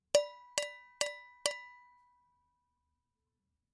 saucepan4hits
Collective set of recorded hits and a few loops of stuff being hit around; all items from a kitchen.
Domestic, Fork, FX, Hit, Hits, Kitchen, Knife, Loop, Metal, Metallic, Pan, Percussion, Saucepan, Spoon, Wood